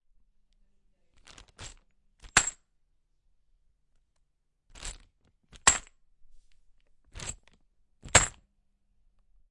fall of bag of nails
Falling bag of nails.
falling, fall, nails, bag